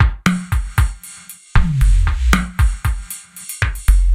reverb short house beat 116bpm with
reverb short house beat 116bpm
dance, rave, house, 116bpm, loop, electronic, electro, beat, club, techno, trance